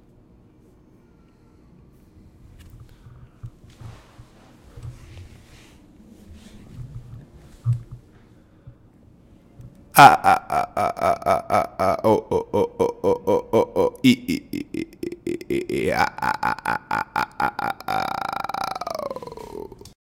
High Pitched Shriek
I screamed and raised the tone by 2000 cents.